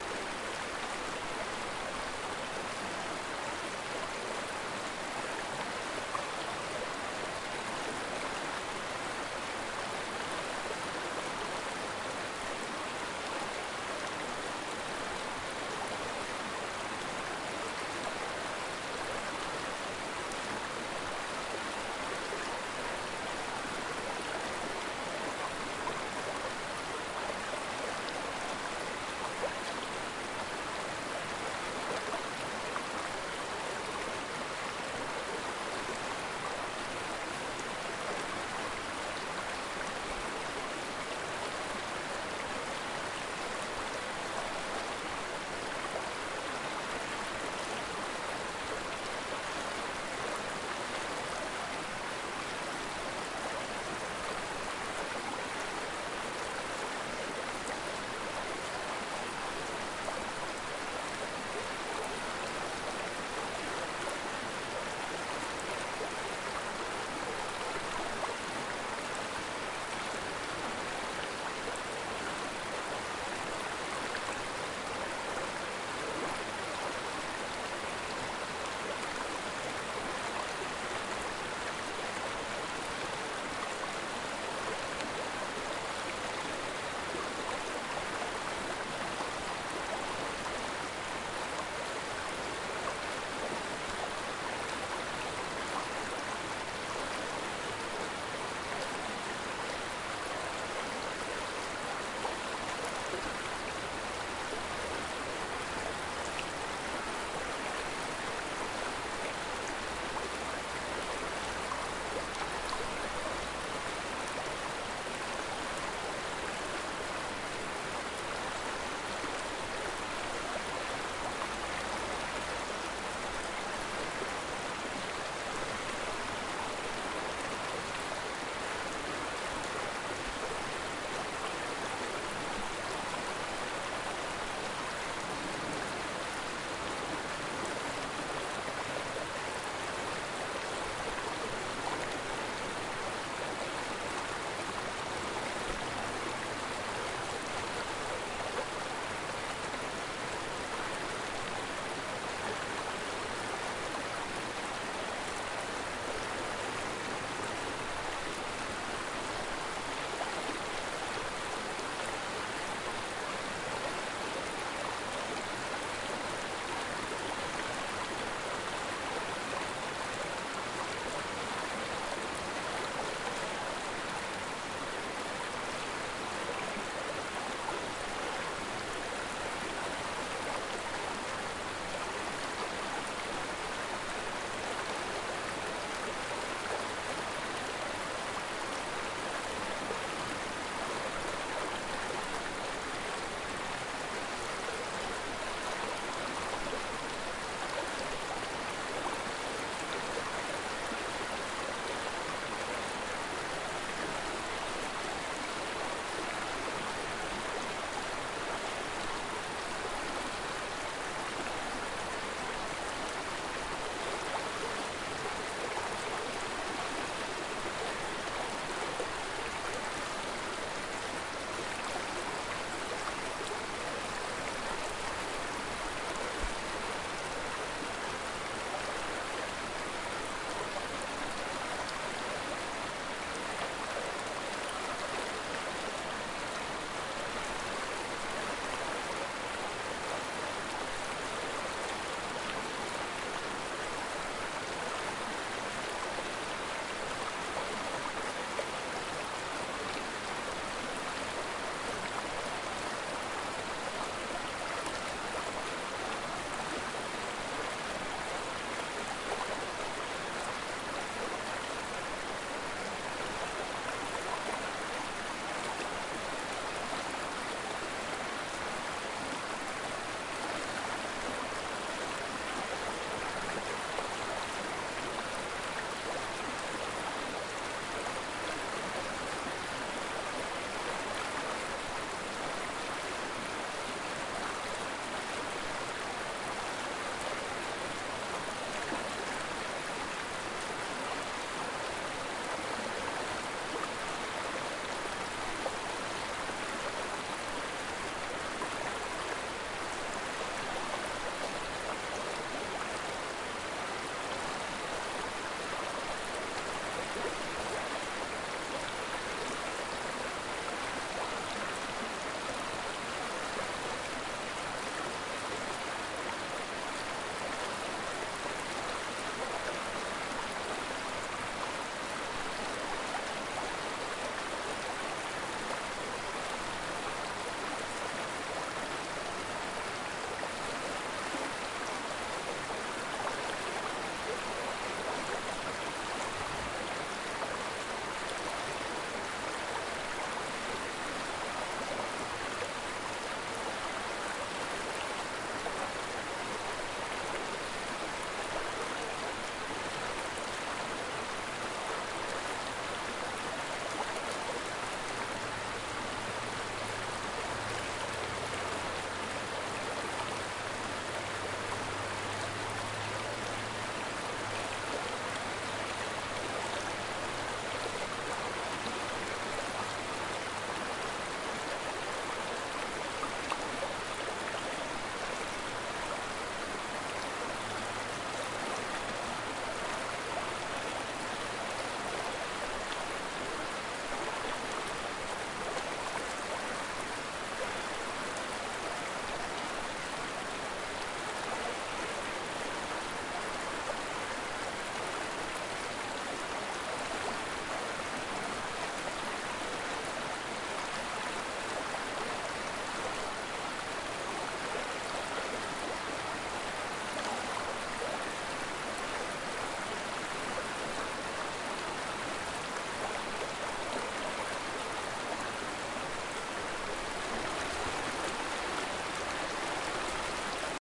The water is moving a little faster over the rocks in this one. The water is more rapid and noisy. Still pretty nice. Zoom H4N